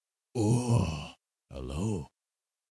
Surprised Man Noise
You finally found this man's long lost cat.